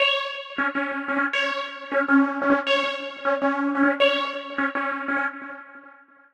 These are 175 bpm synth layers maybe background music they will fit nice in a drum and bass track or as leads etc